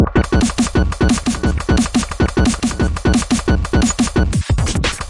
hello this is my TRACKER creation glitchcore break and rhythm sound
sound-design, glitch, soundeffect, sci-fi, experymental, noise, breakcore, lo-fi, skrech, future, core, electronic, digital, overcore, loop, extremist, anarchy